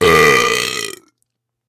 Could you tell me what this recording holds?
A fairly dirty burp. Recorded with an AKG C2000b microphone.
burp, dirty, environmental-sounds-research, mouth